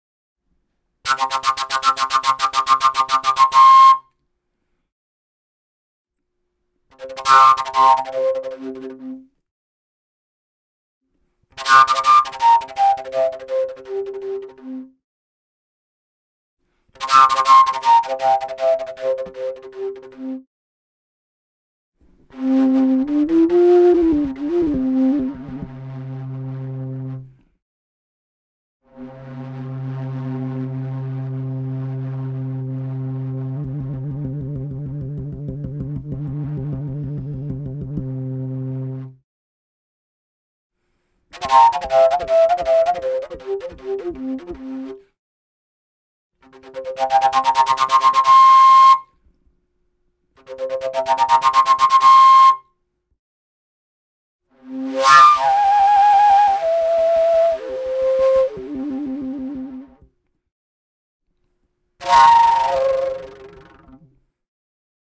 pvc fujara samples 2
Some more samples of PVC fujara flute in C.
ethnic-instruments, woodwind, overtones, overtone-flute, sample, fujara, pvc-fujara